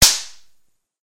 Sound of a toy gun;
Microphone: Huawei Honour U8860 (Smartphone);
Recorder-App: miidio Recorder;
File-Size: 28.5 KB;